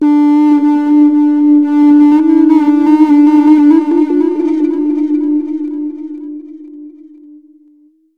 Freed-back - 9
Various flute-like sounds made by putting a mic into a tin can, and moving the speakers around it to get different notes. Ambient, good for meditation music and chill.